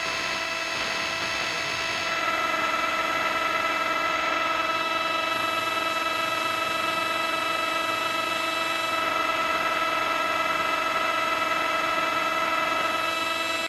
Radio E Pitched Noise
some "natural" and due to hardware used radio interferences